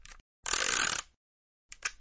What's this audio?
Sello tape
Scotch-tape, office, tape